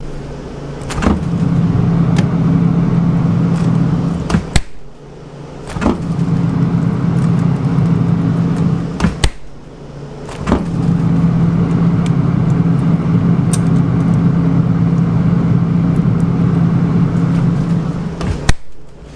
freezer open:close
opening and closing a freezer door
refrigerator, door, freezer, cold, open, close